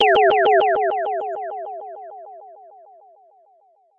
Inspired by an Irwin Allen "Lost in Space" effect.The original sound may have also contained a metalic anvil type sound. Maybe I'll add something like that in the future.For this I started with a couple of sine waves - I applied variable pitches mixed them and put the composite through a multitap echo.See file: "Makinglostspace"
sci-fi, synth, tones